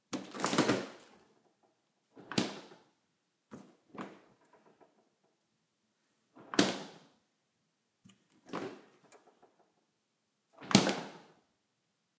Fridge refrigerator door, open and close
opening and closing a refrigerator door
slam, shut, refrigerator, fridge, door